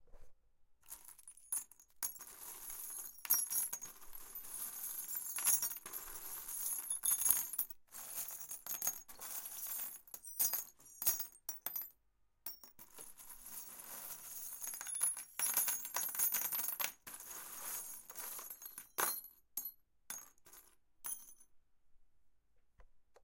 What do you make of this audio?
Bag of chainmail chunks
Picking up and dropping pieces of chainmail
chainmail, ruffling